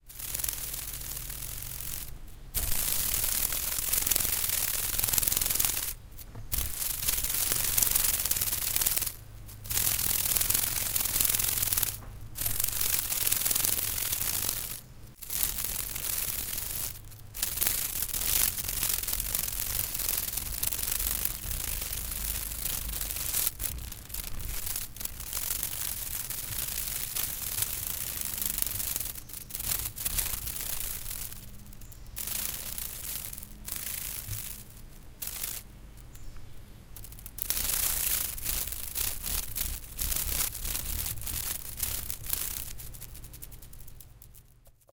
Recording of a dragonfly trapped in a mist net at a bird banding station. Recorded with a Zoom H6 with Mid/Side capsule.